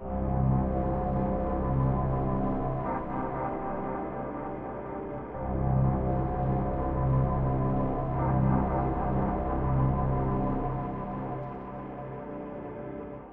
newsoil90bpm
Big texture of drones and pads.Ambient texture. 90 bpm 4/4. Duration: 5 bars.
drone, envirement, pad, ambient, synth, textures